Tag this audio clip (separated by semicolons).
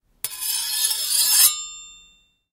Scrape; Sword; Sharp; Knife; Metal